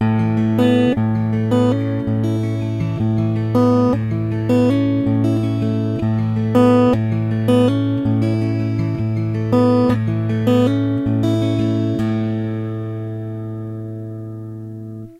Acoustic A Major picking 80bpm
Taylor acoustic guitar direct to desk.
picking, 80bpm, finger, major, a, acoustic